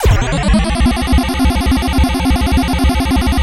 Game Bleeps 4
Sub-heavy arpeggio noise made in NI Massive that could possibly work as a "charge-up" noise in a game or something similar.